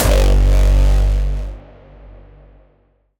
This is one of my hardstyle kicks. Tweaked out of a single emulated (drumazon) 909 kickdrum with long decay. Hardstyle kicks are really complex to make and I really don't want to give much stuff away since each kick I make requires so much effort and work. It's basicly just 1 hard kick sample with long decay, you EQ and distort it a million times carefully until you get it just right. For this kick I used Cubase's Studio EQ as EQ, Camelcrusher and Camelphat3 for distortion and D16 Toraverb for a slight reverb to get more drive in it.
To pitch it in as example Cubase you just edit the audio, choose the tail (not the punch) and use the pitch shift tool. The rootnote is G2.
The stereo image is wide on purpose, use a stereo imager plugin to get this kick in control, hardstyle kicks should be in mono.
newstyle, camelphat, bassline, kickdrum, access, nu, hardcore, 5, punch, camelcrusher, 909, style, hardstyle, dance, kick, hard, emulated, bass, toraverb, layered, tr, d16, tail, sx, nustyle, izotope, cubase, reversed, drumazon, tok